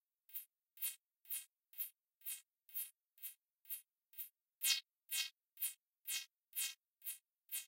noisy hat loop
Loop at 125 beats per minute of lo-fi digital hi-hat type sound.